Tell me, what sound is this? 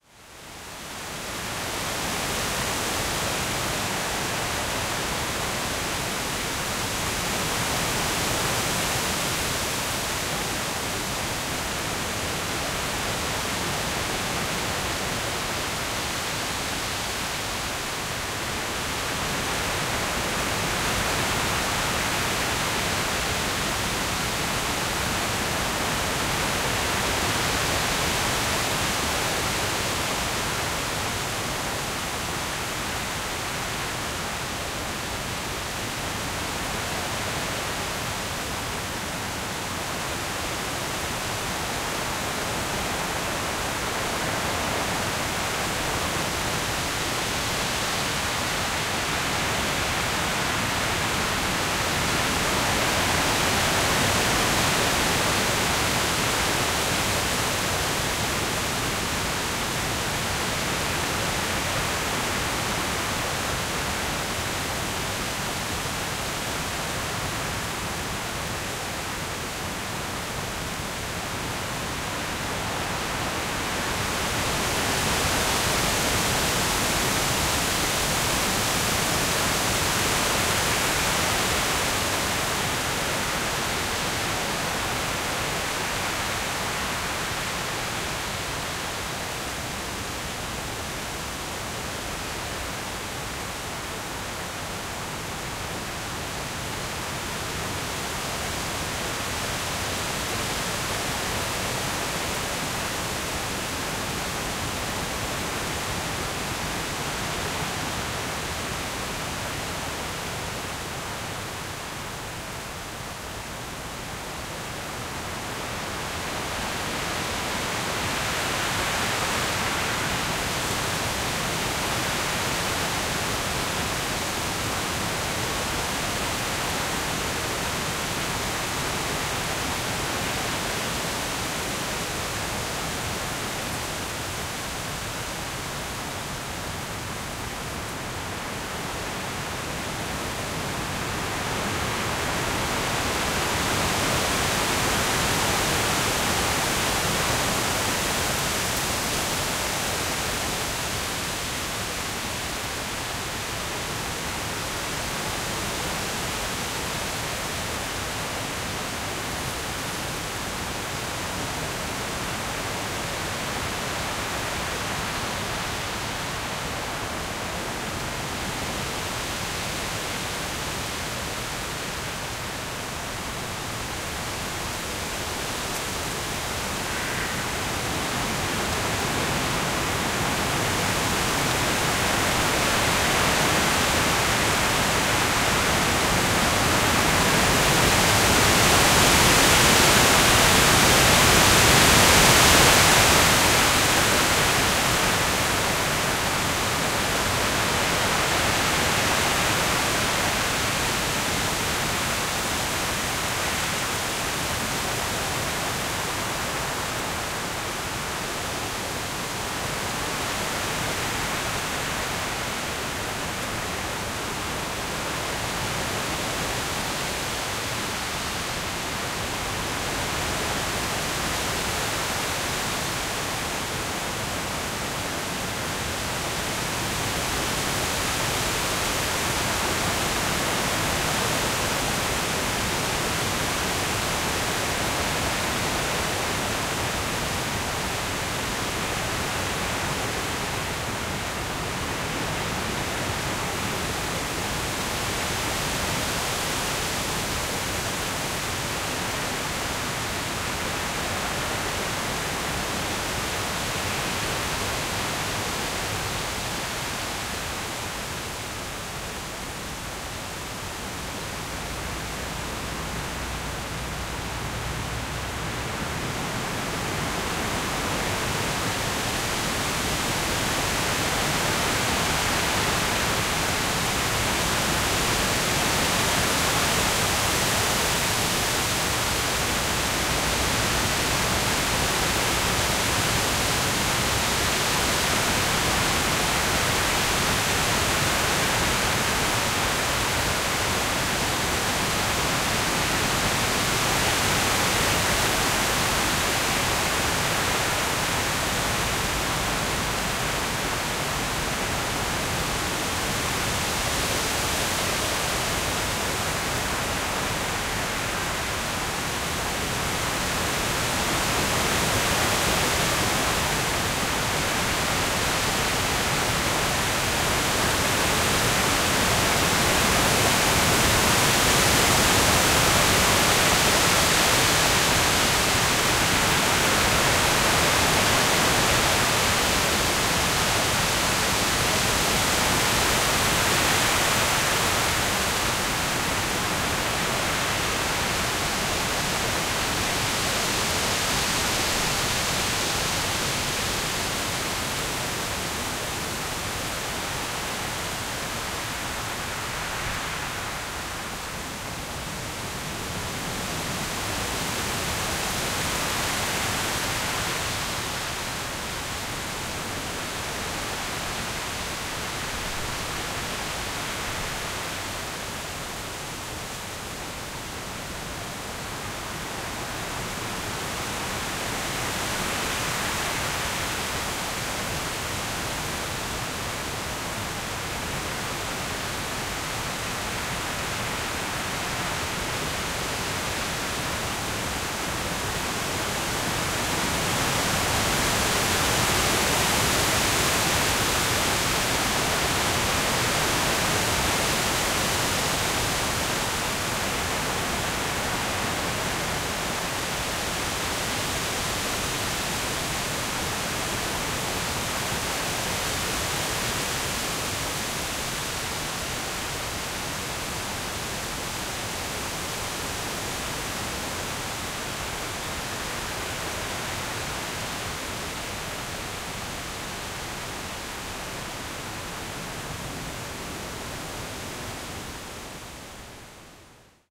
Wind In Woodland
A stereo field-recording of quite a strong wind blowing through a deciduous woodland (ca 20-25 mph).Rode NT4 + Blimp > FEL battery pre amp > Zoom H2 line in.
forest, leaves, woods, woodland, stereo, xy, wind, field-recording, trees, wind-on-trees